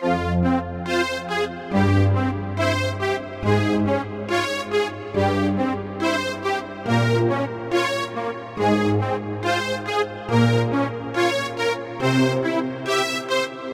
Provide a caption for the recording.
Strings i composed together for a medieval feel in a song. 140 bpm